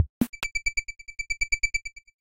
trCJ 8000 loop
Fx from TB303